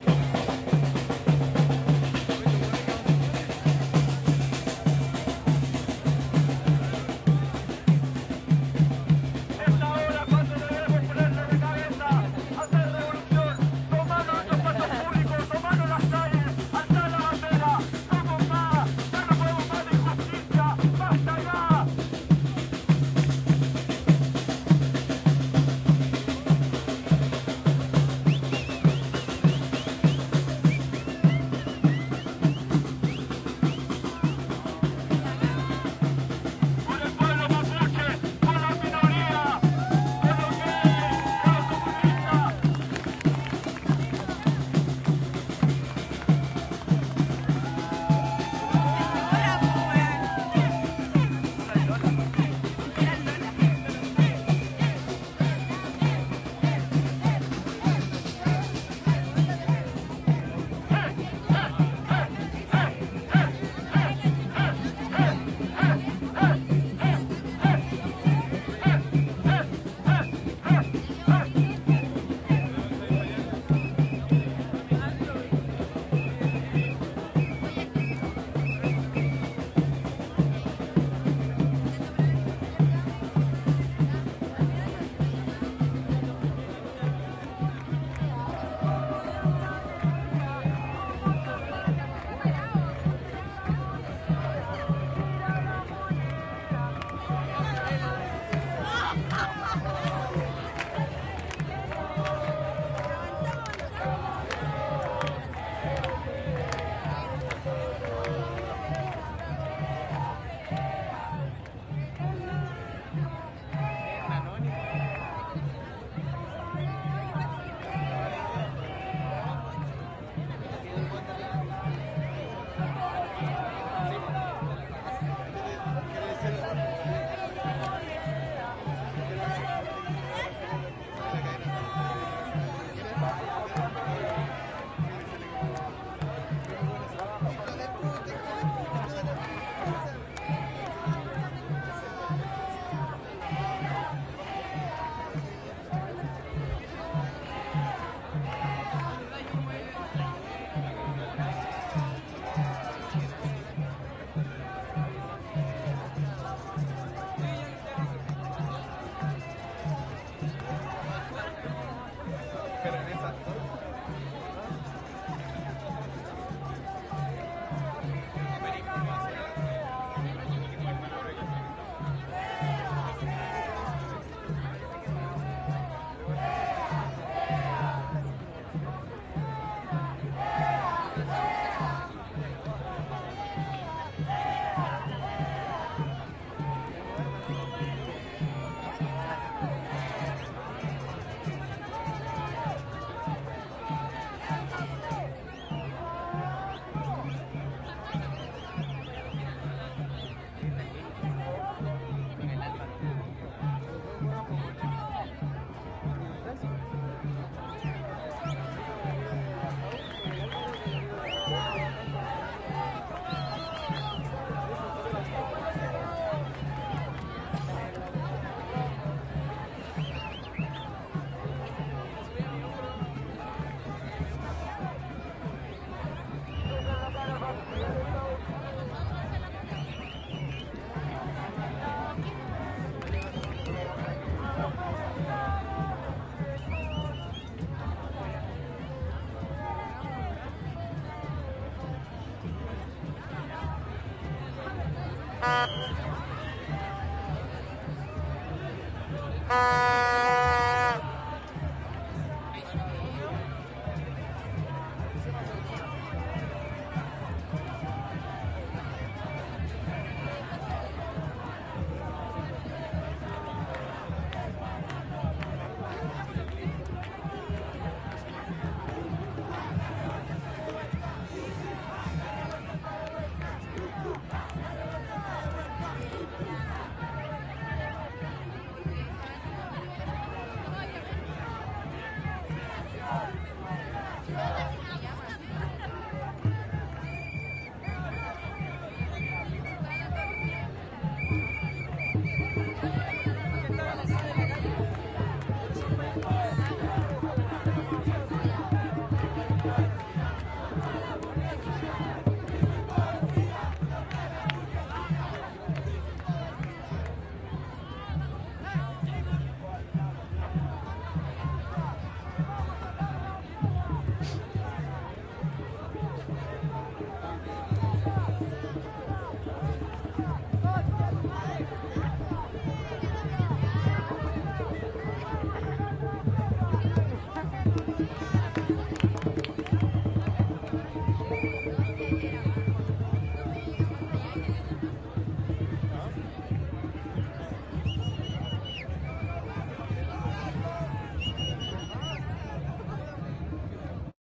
Marcha estudiantil 14 julio - 06 megafono de los profes
FUA para los carabineros
"vamos a la guerra"
"tengo miedo"
trompeta con la guayabera
primeros estornudos lacrimógenos
guayabera, calle, sniff, lacrimogena, educacion, paro, nacional, exterior, estornudo, profesores, chile, megafono, protest, marcha, street, crowd, fua, protesta, people, gente, trompeta, strike, santiago